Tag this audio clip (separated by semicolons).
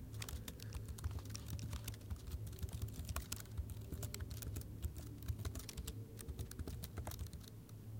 teclado; notebook